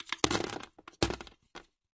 delphis ICE DICES LOOP #120-2
PLAY WITH ICE DICES SHAKE IN A STORAGE BIN! RECORD WITH THE STUDIO PROJECTS MICROPHONES S4 INTO STEINBERG CUBASE 4.1 EDITING WITH WAVELAB 6.1... NO EFFECTS WHERE USED. ...SOUNDCARD MOTU TRAVELER...
120, dices, loop